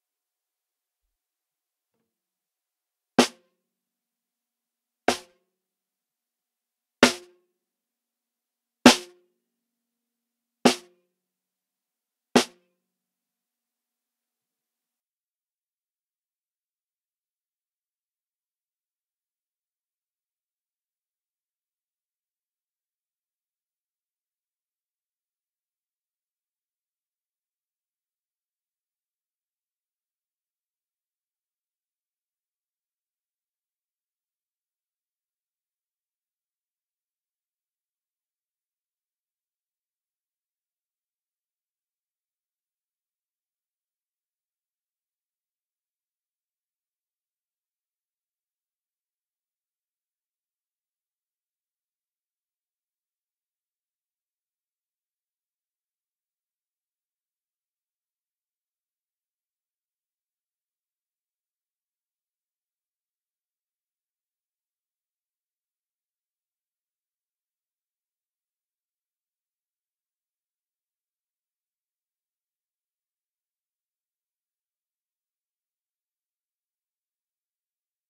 SNARE 11122018 - BD 200 BOTTOM MONO
Make sure to flip the phase on this one
bass, drum, sample, samples, snare